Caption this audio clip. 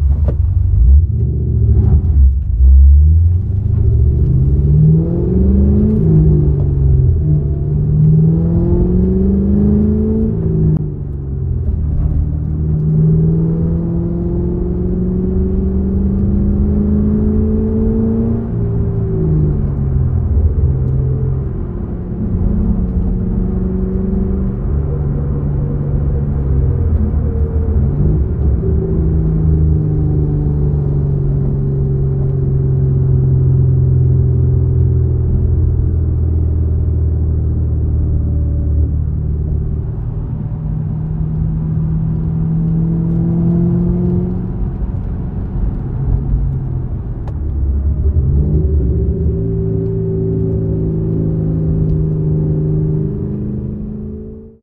Sound of a Mustang GT500. Recorded on the Roland R4 PRO with Sennheiser MKH60.
car, drive, engine, fast, GT500, mkh60, mustang, passing-by, starting, stopping